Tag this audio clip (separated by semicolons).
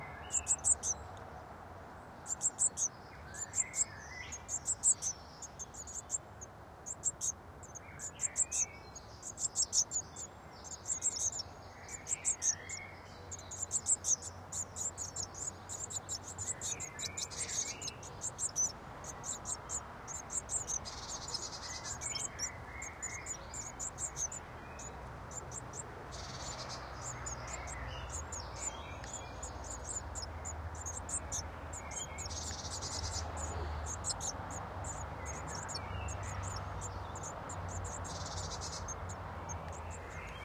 birds; birdsong; field-recording; nature; outdoor; spring; wildlife